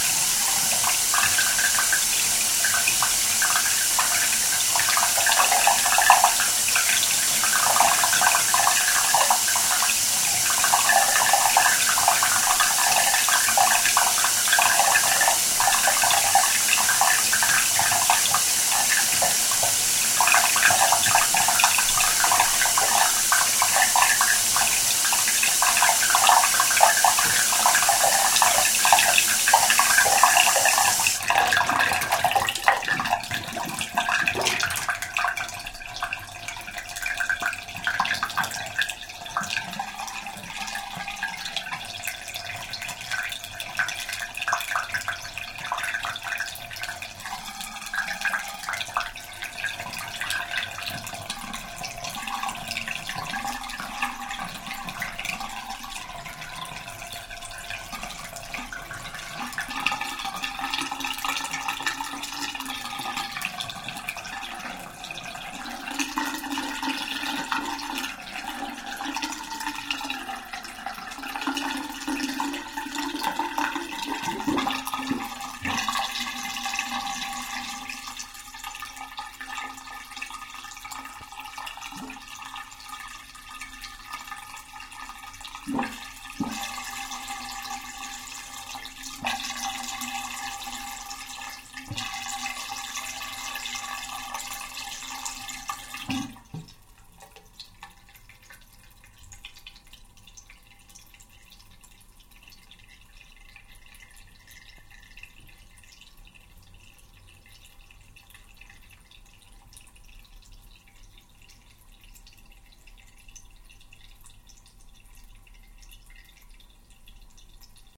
Water gurgling in the bath overflow hole. Full version.